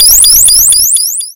an electronic sweep sound